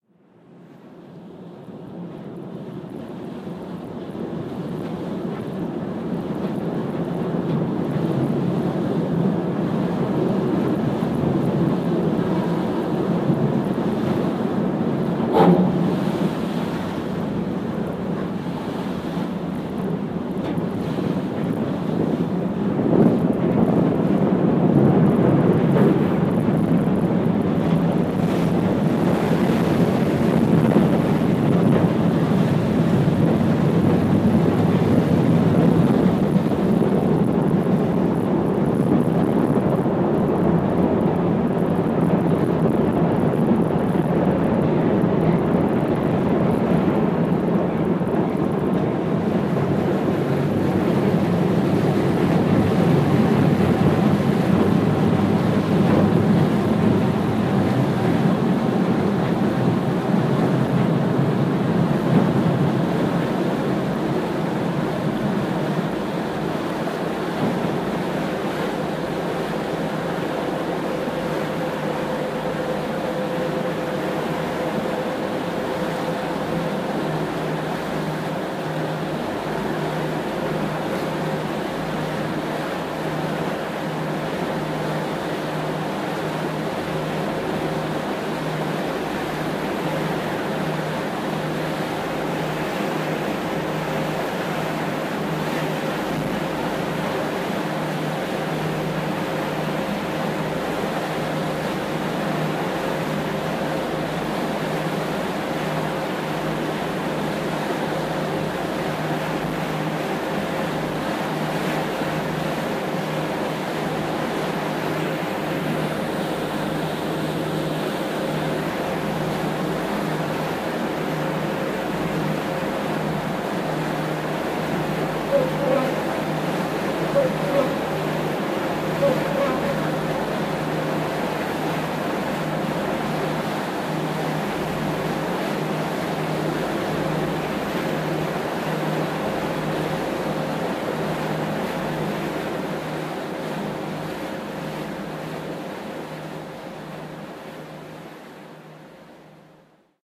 This sound is a sample of an Australian Sydney Ferry leaving Circular Quay with the motor rumbling.
Boat, Castle, Grumble, Rumble, Seafarer, Sydney